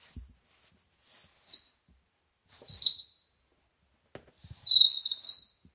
ChiridoZapato Sound FX
Squeaky of shoes in a basketball arena
Squeaky, Sound, Sport